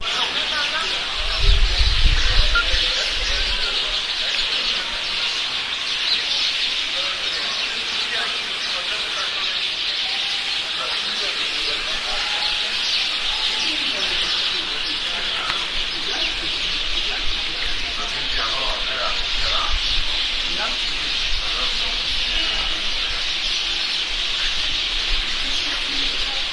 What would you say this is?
This sound was recorded with an Olympus WS-550M and it's the sound of sparrows at Moreria street, in the commercial zone of the city of Figueres.
birds, noise, starlings